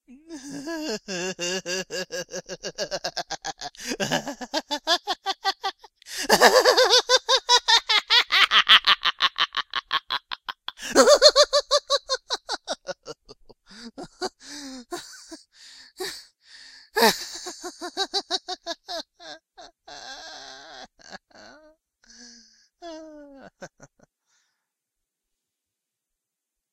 Evil Laugh 7
demented, maniacal, halloween, cackle, laugh, evil